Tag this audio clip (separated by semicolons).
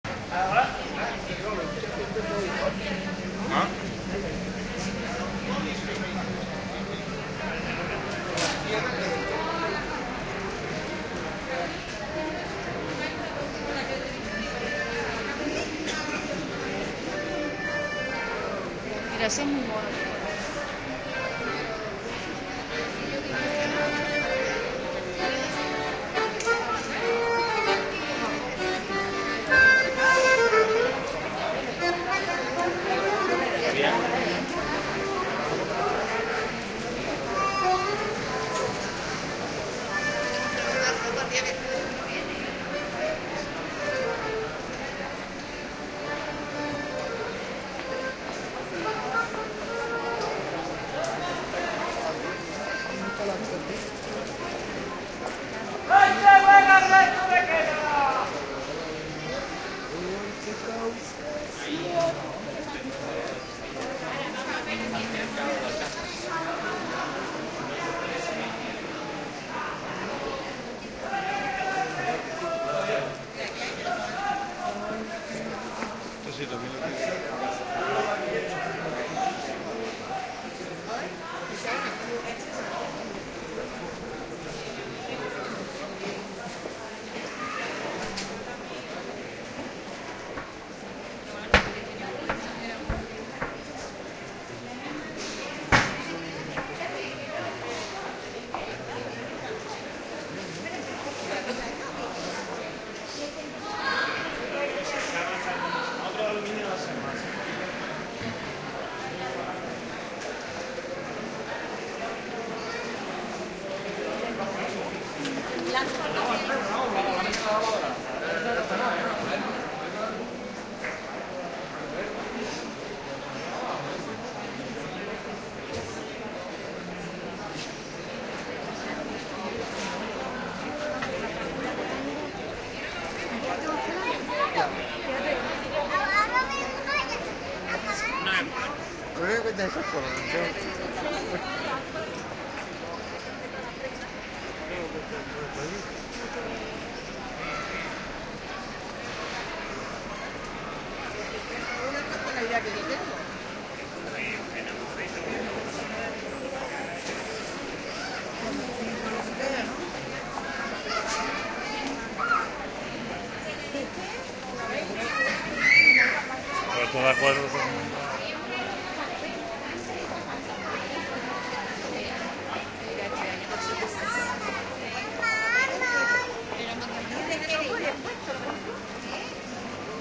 soundwalk; binaural; street-noise; accordion; talk; ambience; city; spanish; field-recording; spring